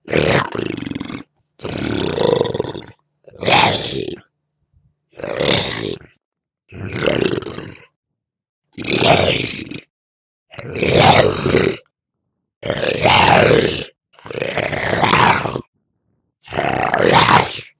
monster snarls 4
A more attack-y sounding monster snarl
animal, beast, creature, growl, hound, monster, snarl